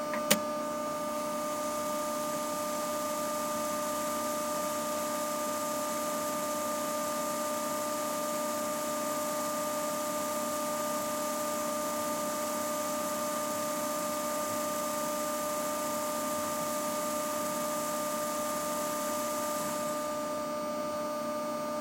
0104 DVW500 int cpsunlk-ff
DVW500 capstan servo unlocking and fast-forwarding tape.
This sample is part of a set featuring the interior of a Sony DVW500 digital video tape recorder with a tape loaded and performing various playback operations.
Recorded with a pair of Soundman OKMII mics inserted into the unit via the cassette-slot.
cue, digital, dvw500, eject, electric, field-recording, jog, machine, mechanical, player, recorder, shuttle, sony, technology, video, vtr